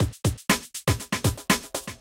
A dnb beat for any Dnb production, use with the others in my "Misc Beat Pack" to create a speed up. To do this arrange them in order in your DAW, like this: 1,2,3,4,5,6,7,8, etc